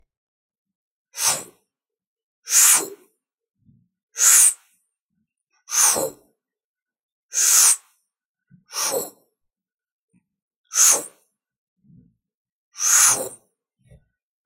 A whooshing sound I made with my voice.
Recorded with a CD-R King PC Microphone and processed on Adobe Audition.
swhish, swoosh, swosh, whoosh